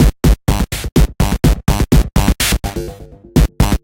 Drums loop Massive 125BPM
125bpm, drums, groove, loop, massive